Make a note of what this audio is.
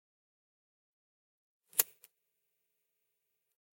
A plastic Bic lighter being lit.